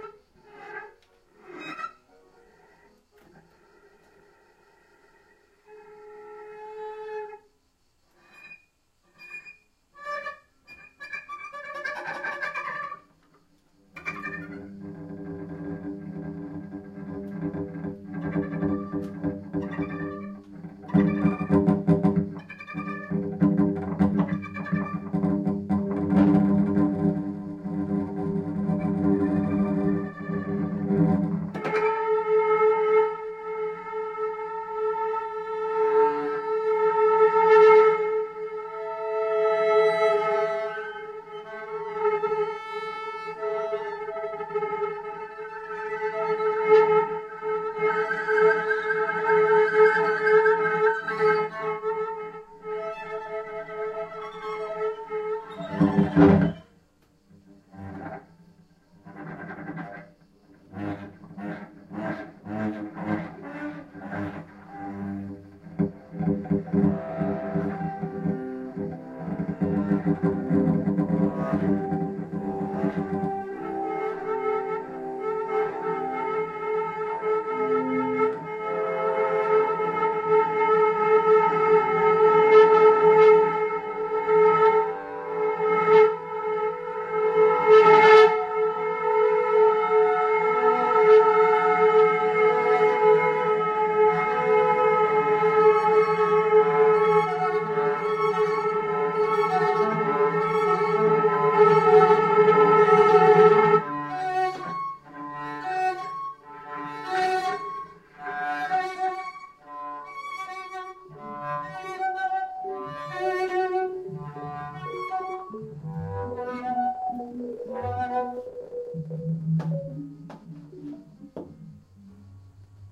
Cello in electroacoustic music
This is a recording made in a rehearsal session for an electroacoustic orchestra, where cello is played and the resulting sound is morphed.
electro-acoustic, staccato